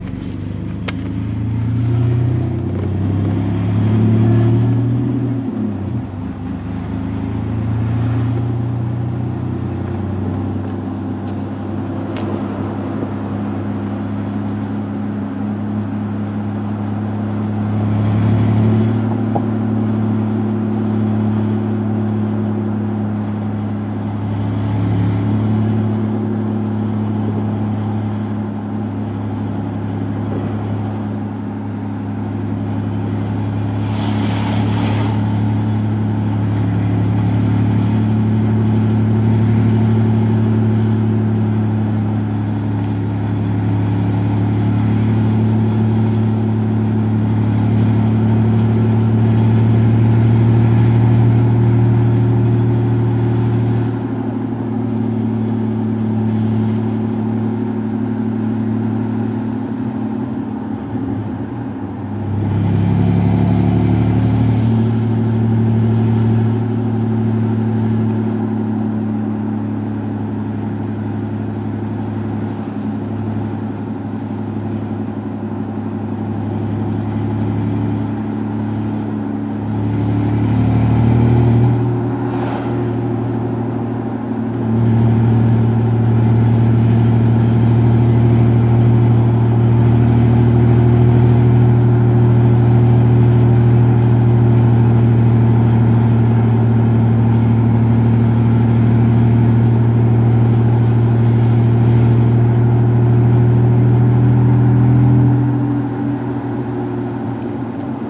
Cars - truck interior while driving 2 (loud)
1998 Dodge Dakota Sport V6 with Flowmaster exhaust driving, as heard from inside the cabin with the window open.